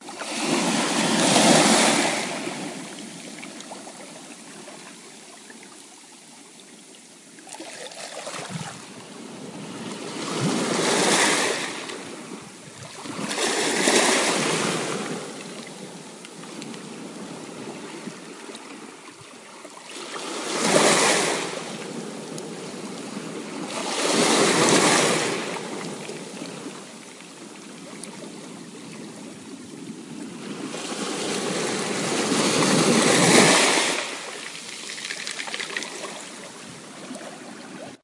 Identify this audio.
East coast of the Black Sea not so far from Gelendzhik city.
Afternoon about 5:00pm. October 25th 2015.
Recording device IPhone 5S. Recorded on the beach.